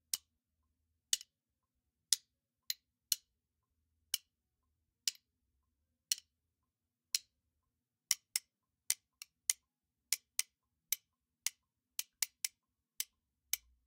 Collective set of recorded hits and a few loops of stuff being hit around; all items from a kitchen.
Hits, Pan, Spoon